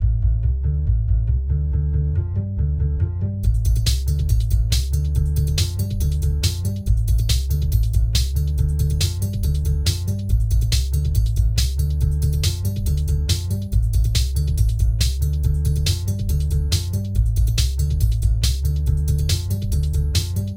Acid Jazz Loop - Music Bed
Cool and jazzy music bed loop for radio stations and podcasts.
This sound was made using cubase, with some samplers of a 70's Drum machine and a classical Upright Bass.
Music-Bed, Jazz-Loop, Funky, Bass, Jazz